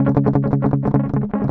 cln muted C# guitar
Clean unprocessed recording of muted strumming on power chord C#. On a les paul set to bridge pickup in drop D tuneing.
Recorded with Edirol DA2496 with Hi-z input.
160bpm, c, clean, drop-d, guitar, les-paul, loop, muted, power-chord, strumming